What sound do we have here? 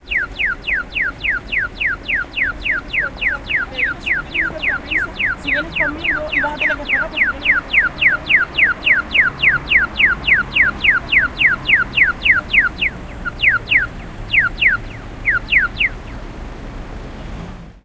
Traffic light. Talking Spanish.
20120324